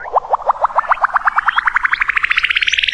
reinsamba Nightingale song dubblematiczipbend-rwrk
reinsamba made. the birdsong was slowdown, sliced, edited, reverbered and processed with and a soft touch of tape delay.
ambient, animal, bird, birdsong, bubble, delay, dub, echo, effect, electronic, funny, fx, happy, liquid, natural, nightingale, pitchbend, reggae, reverb, rising, score, soundesign, space, spring, tape, water